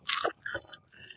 Popcorn eating
A clip of popcorn being eaten.